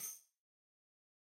tambourine hit 12
10 inch goatskin tambourine with single row of nickel-silver jingles recorded using a combination of direct and overhead mics. No processing has been done to the samples beyond mixing the mic sources.
acoustic, dry, instrument, multi, real, skin, stereo, tambourine, velocity